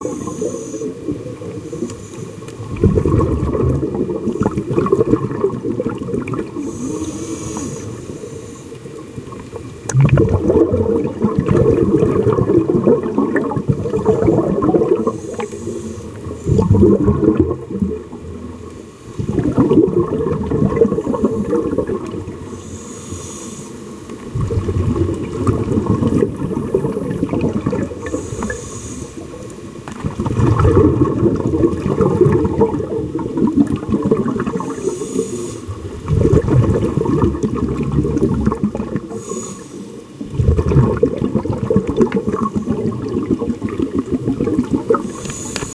Underwater scuba diver
An audio channel extracted from GoPro footage of a scuba diver exploring underwater.